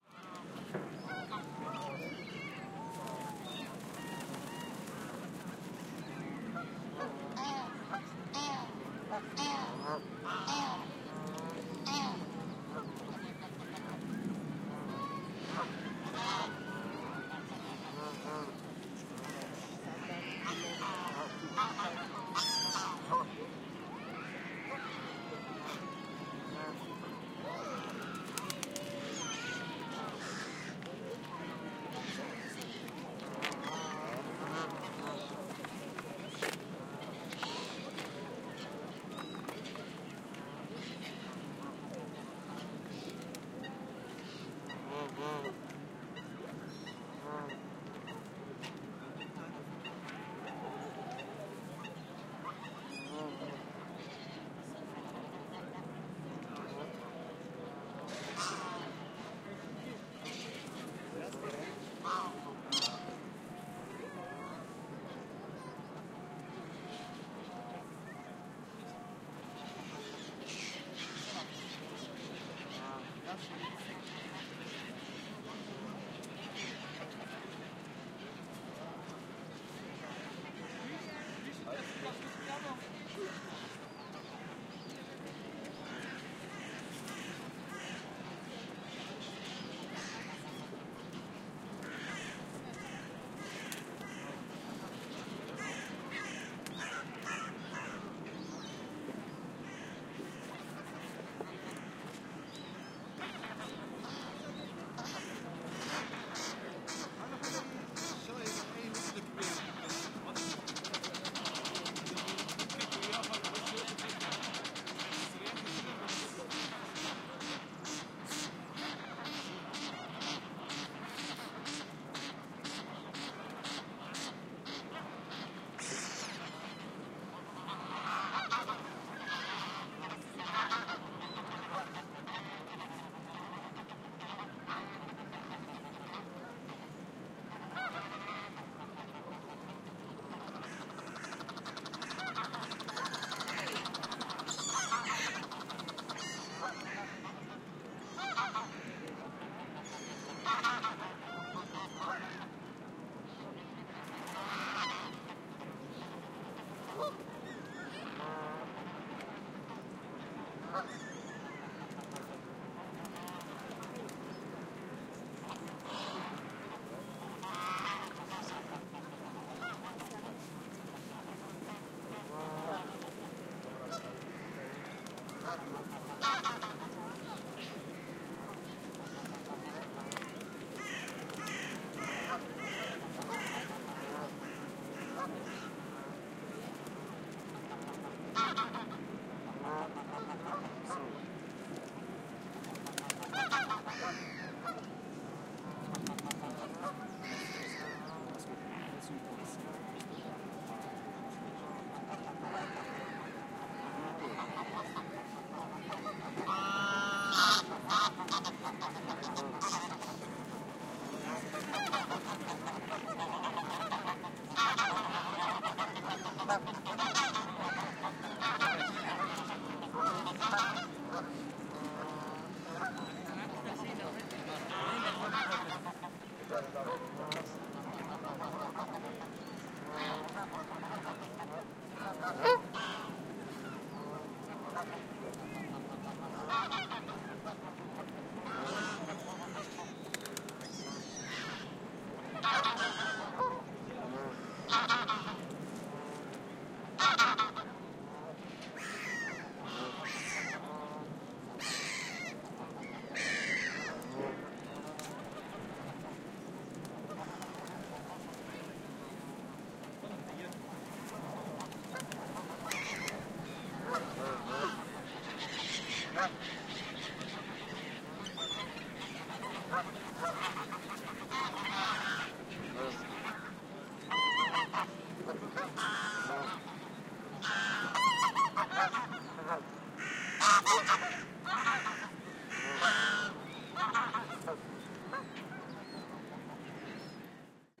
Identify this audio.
HYDE PARK SERPENTINE
A field recording of the Serpentine in Hyde Park, London, England. Recorded with a Zoom H6 and cleaning up in post with Izotope RX.